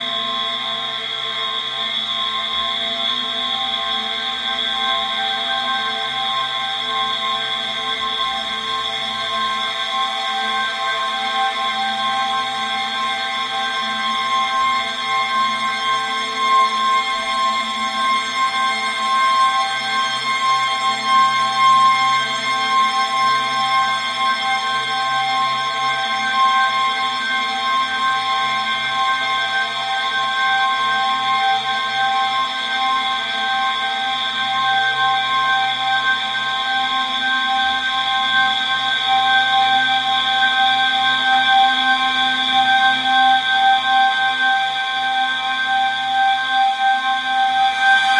harmonizing fire alarms
Somebody set off the fire alarm, and, as I was running down the stairs, I heard something beautiful.